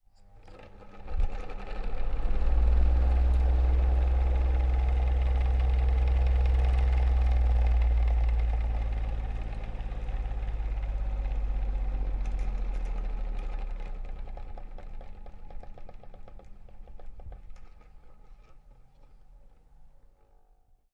FAN 3 (Slow Stop)
wind, motor, old, airflow, fan